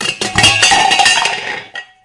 Metal pot falling on ground
chaotic, clatter, crash, objects